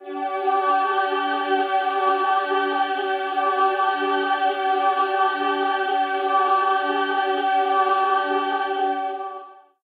There are D & G notes singed together - known as fourth interval/backward fifth interval/G power chord.
100% natural; quasi-synth sounds are the glitches.
choir, chord, D, fourth, G, interval, power-chord, voice